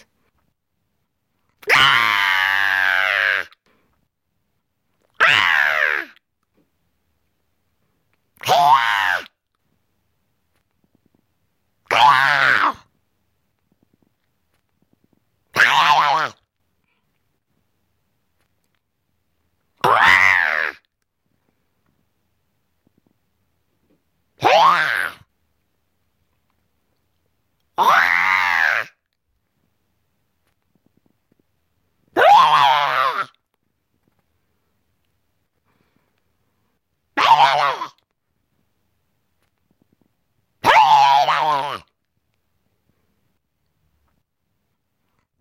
A few different options for a high monster/creature scream, or maybe it's just me after a project crash. It's fun to be a monster.